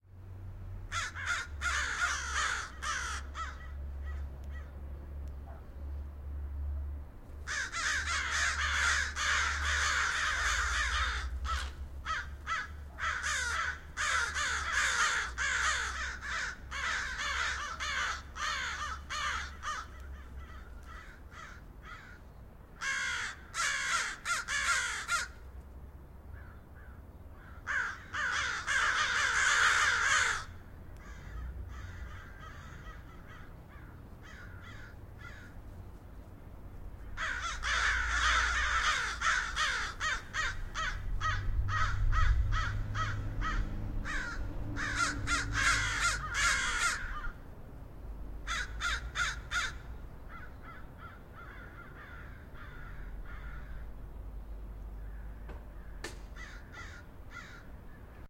A bunch of crows in a tree talking to another group down the street.